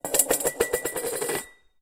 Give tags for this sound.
bowl counter-top countertop dish laminate metal metallic plastic spin spinning spun

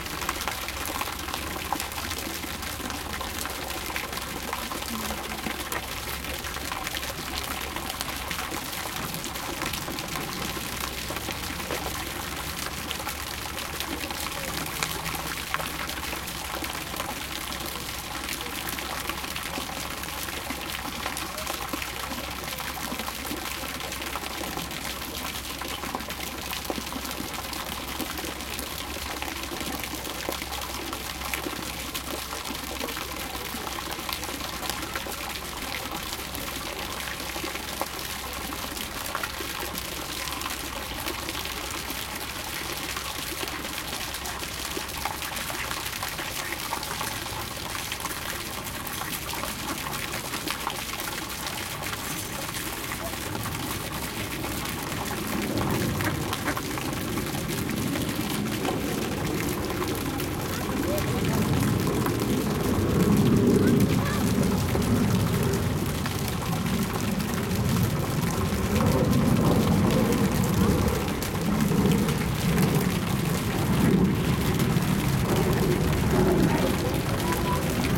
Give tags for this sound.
ambience ambient field-recording lake nature parc water

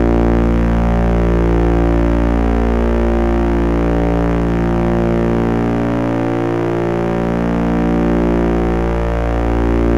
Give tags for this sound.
8-bit LabChirp ambient digital drone duty-sweep electronic game laboratory loop loopable modulation robot sound-design sweep video-game videogame

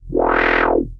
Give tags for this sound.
analog mtg studio synthesis

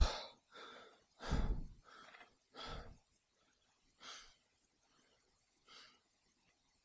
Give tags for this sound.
breath breathe exhale foley inhale

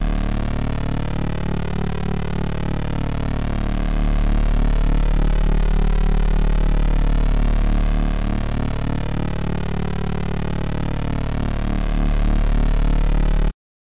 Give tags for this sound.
analog square synthesizer

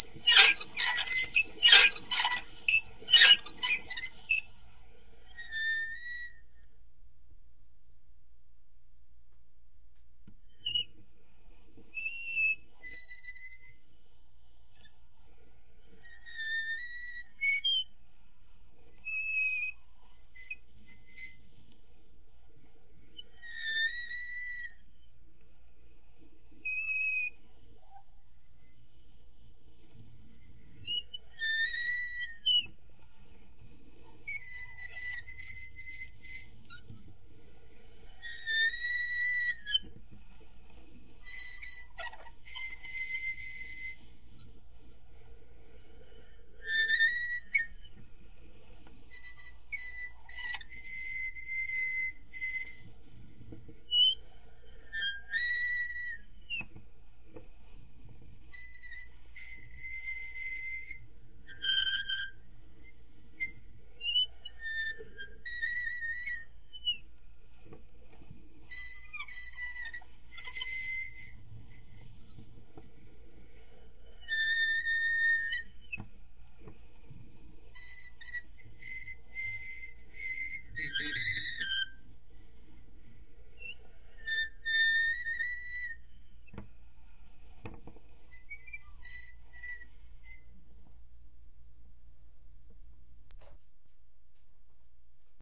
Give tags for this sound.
dat metal using mono toy squeaking recorded 416

